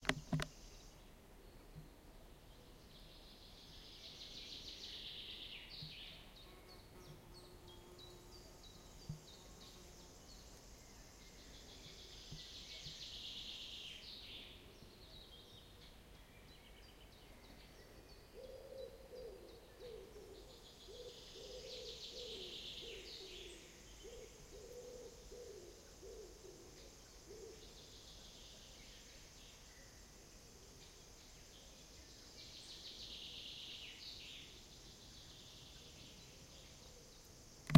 birds such forest pigeon singing
forest birds in Finland
nature, birds, field-recording